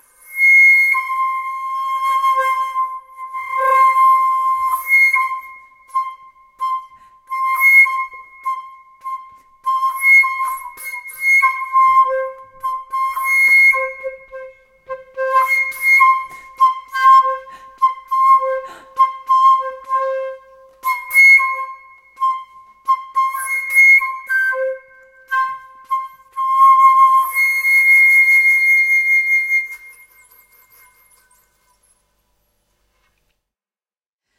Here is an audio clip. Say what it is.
Flute Play C - 11
Recording of a Flute improvising with the note C
Flute, Instruments, Acoustic